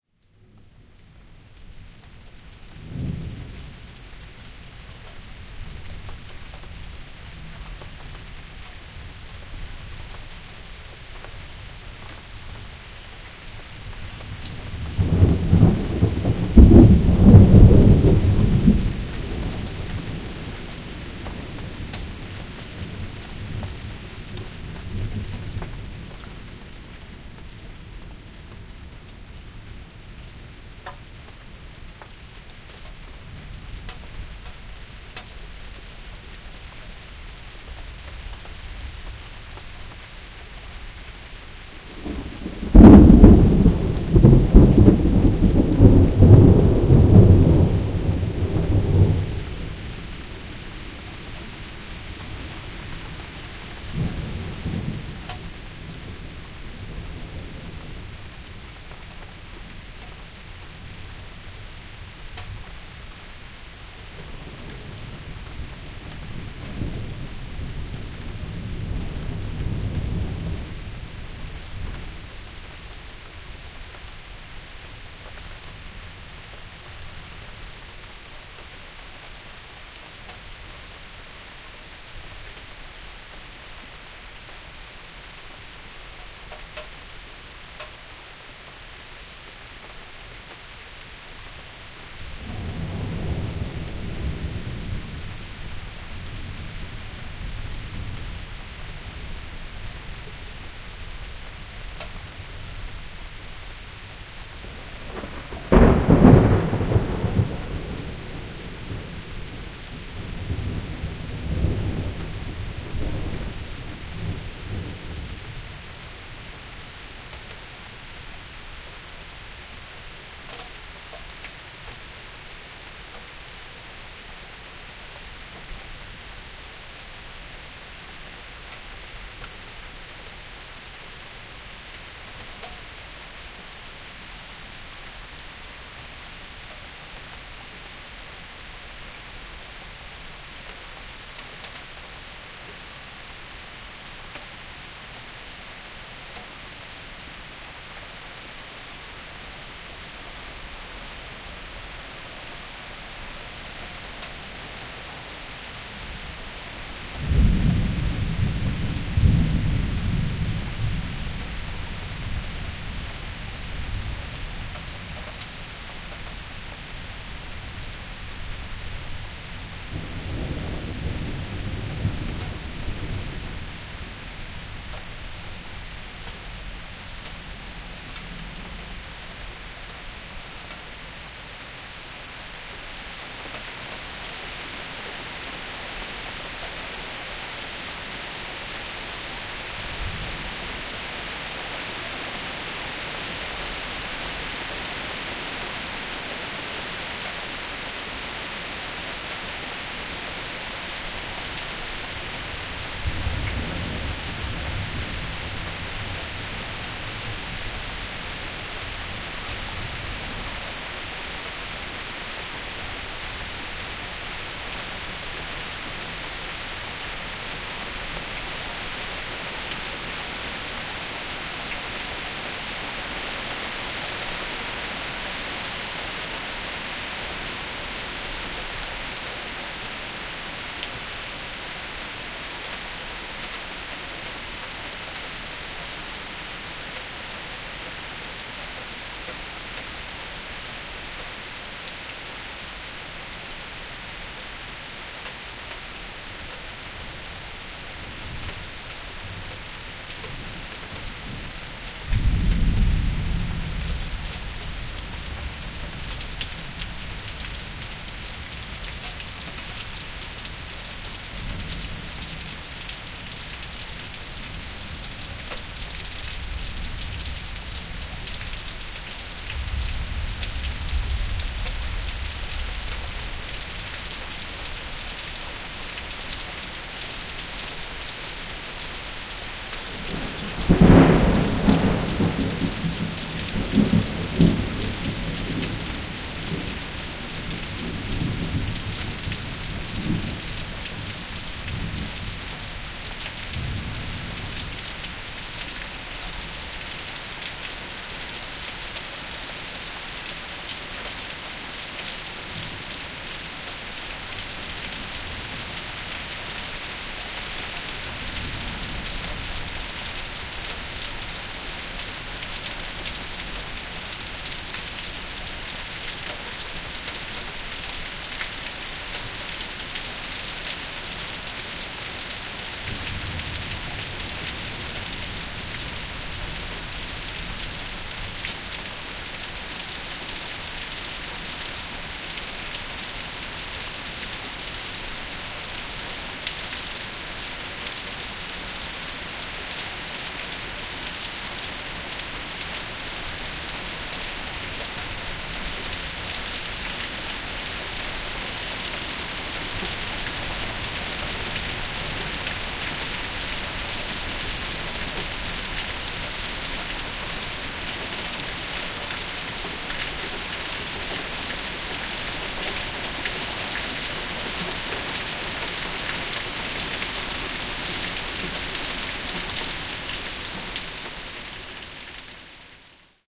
070115 Glorious Early Morning T-Storm
Recorded on an Olympus LS14 with in-ear Roland mics sticking my head through my back porch screen door. Very wet, but very worth it!